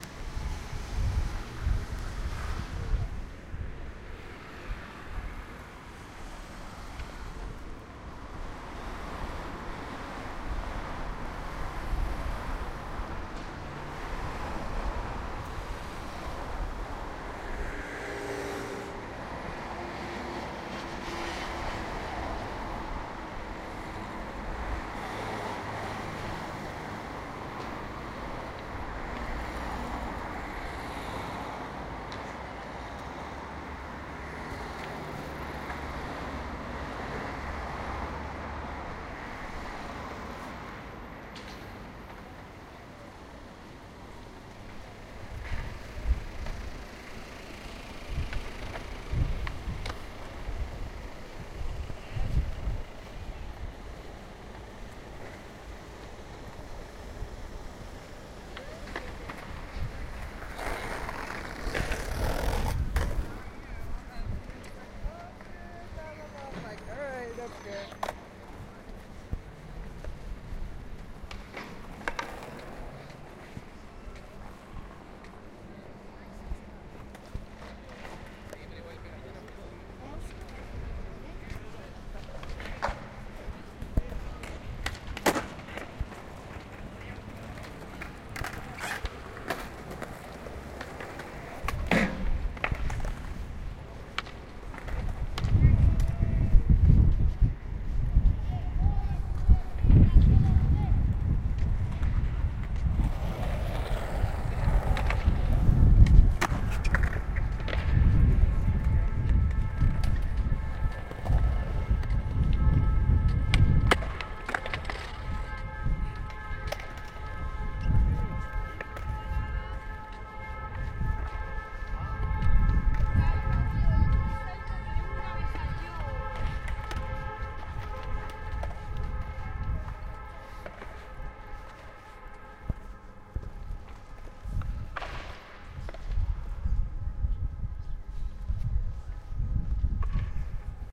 Paseo por la calle
This is part of a series of workshops done in collaboration with Casa Asia, that attempt to explore how immigrant communities in Barcelona would represent themselves through sound. Participants are provided with recorders that they can take with themselves and use daily, during a period of time.
In the workshop we reflect collectively on the relation between the recorded sounds, and their cultural significance for the participants. Attempting to not depart from any preconceived idea of the participant's cultural identity.
Sound recorded by Jasper Villena.
"En esta grabación se pueden escuchar vehÌculos, skaters etc... Estoy al lado de las 3 chimeneas, estoy de paseo en la Avinguda Parallel, donde veo edificios, restaurantes, un parque, esta grabaciÛn me inspiró mi madre por la tarde. Grabé esta grabación por que, a veces, la gente va de paseo por la tarde y ami me gusta salir por la calle y estar con mis amigos."
3chimeneas, salir, culo, veh, skaters, parque, filipino-community, gente, casa-asia, parallel, paseo, elsodelescultures, inspirar, madre, restaurantes, edificios, amigos, calle, intercultural, tarde